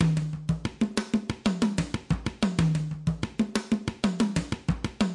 ethnic beat3
congas, ethnic drums, grooves
drums, grooves, ethnic, congas